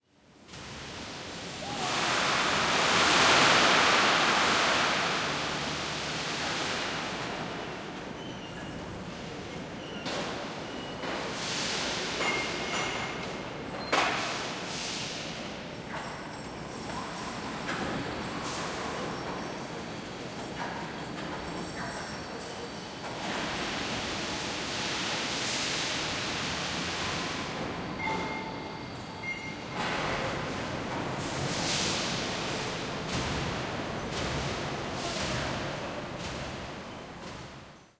Unprocessed stereo recording in a steel factory.